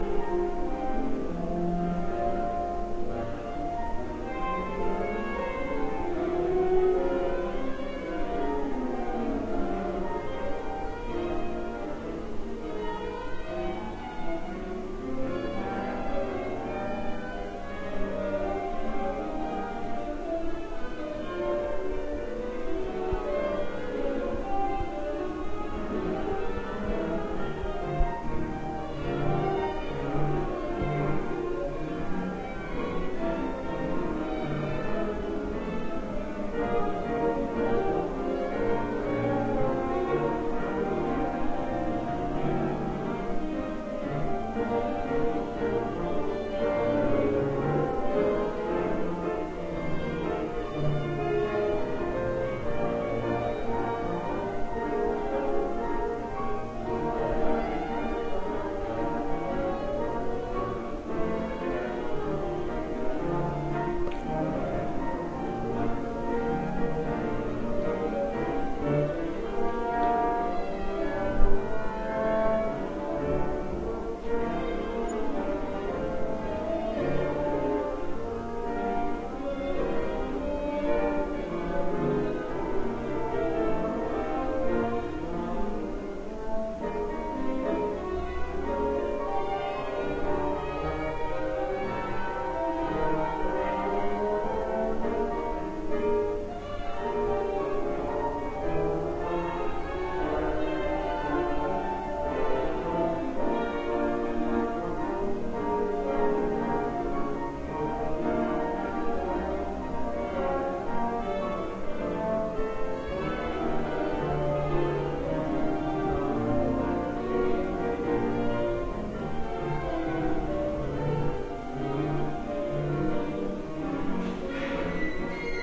Ambient of music college on hallway.
exercise, intsrument, rehearsal, tuning